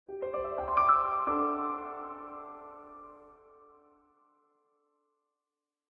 A smooth and fast phrase expressing content.